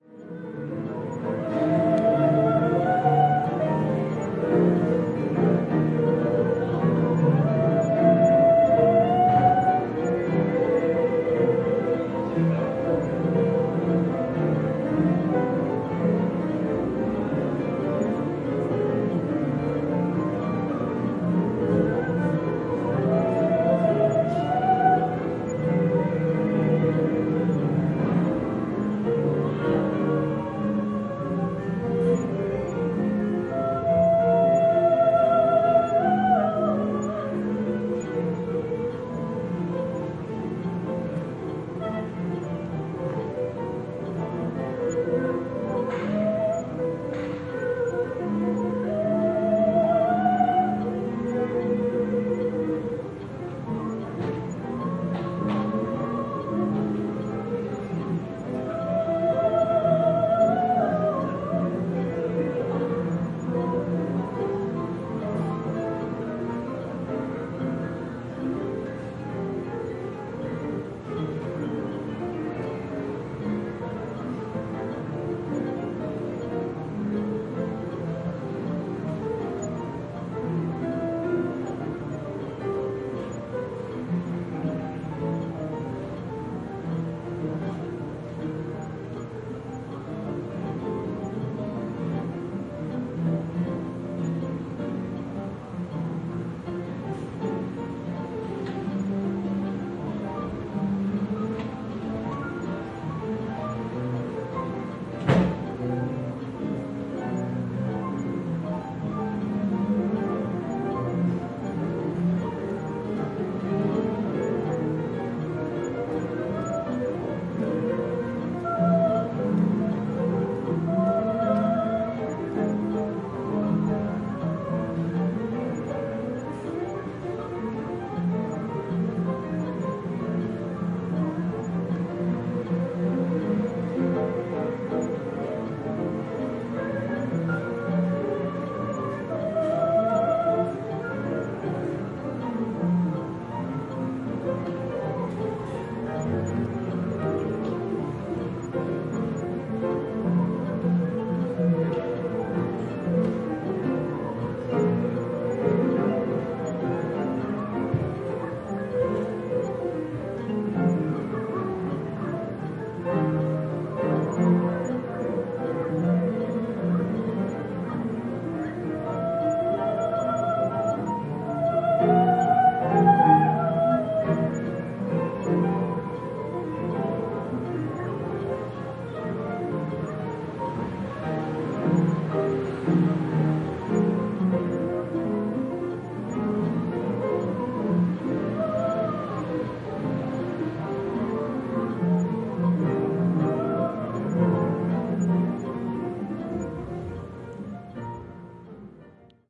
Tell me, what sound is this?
Standing in Front of The Music hall in Honam Theological University and Seminary in Gwangju. A lot of Musicians are practicing their Instruments
all-together, binaural-recording, caos, field-recording, music, piano, potpurri, practice, singing, voice